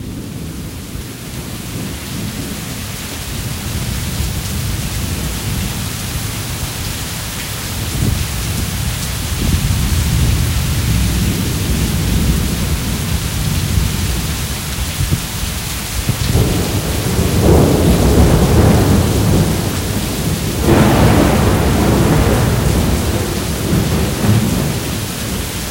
A very short recording of a thunderstorm in Goa, India. Unfortunately not long enough but may come in handy for someone looking for a short thunderstorm sound with rain and rumble.
ambience, ambient, cyclone, field-recording, Goa, India, lightning, nature, rain, raining, rainstorm, rumble, storm, thunder, thunder-storm, thunderstorm, typhoon, weather, wind